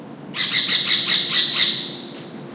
Screechings from Lesser Kestrel. EM172 Matched Stereo Pair (Clippy XLR, by FEL Communications Ltd) into Sound Devices Mixpre-3.
spain, lesser-kestrel, bird, field-recording